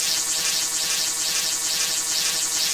Synthesised Electricity
Fake electricity, as opposed to the real kind available in any household outlet.
arc, buzz, buzzing, electric, electricity, high-voltage, scifi, volt, voltage, zap, zapping